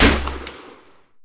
Steampunk Crossbow Shot 2
The sound of a mechanical self-made crossbow construction giving off a heavy shot.
Edited with Audacity.